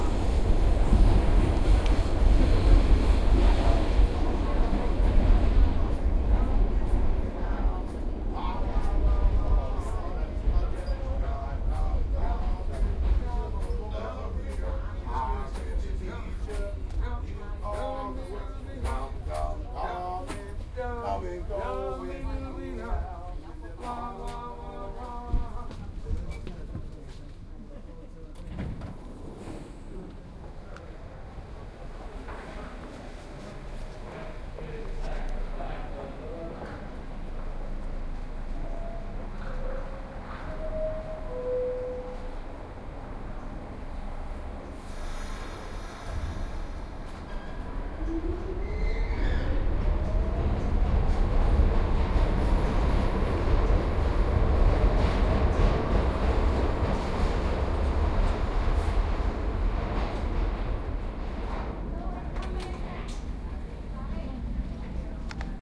Field recording in New York subway: doowop singers pass by, then you hear the doors closing and the train departing.
singing, subway, ambience